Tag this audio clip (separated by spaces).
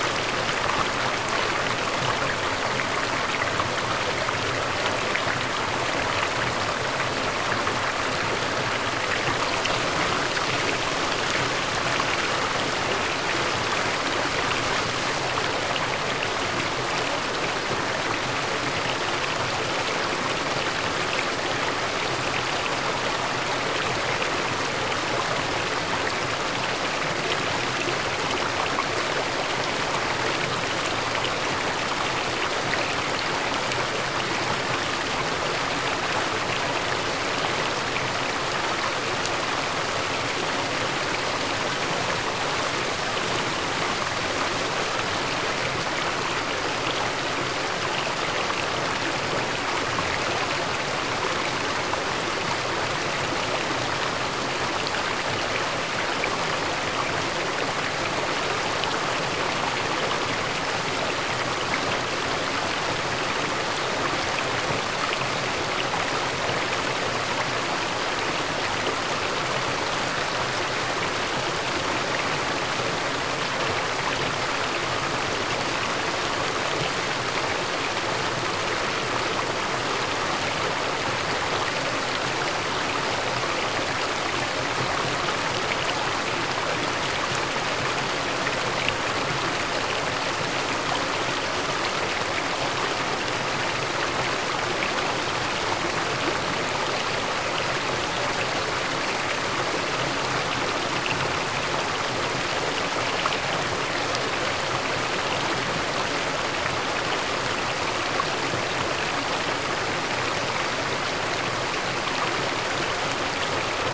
loop ambient trickle stream field-recording relaxation dribble relaxing water river noise flow